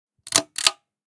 Desk Lamp - Chain Pull (Fast)
This is the sound of a desk lamp chain being pulled at a fast speed.
- PAS
Lamp, Chain, Click